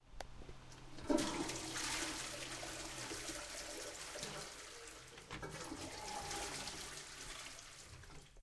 Toilet Flush
A student is flushing the toilet in the university restroom. It has been recorded with the Zoom Handy Recorder H2 in the restroom of the Tallers building in the Pompeu Fabra University, Barcelona. Edited with Audacity by adding a fade-in and a fade-out.
bathroom, campus-upf, drip, flush, tallers, toilet, university, UPF-CS14, water, zoomH2handy